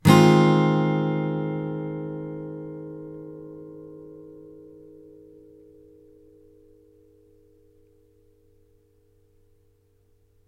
The next series of acoustic guitar chords recorded with B1 mic through UB802 mixer no processing into cool edit 96. File name indicates chord played.
acoustic, clean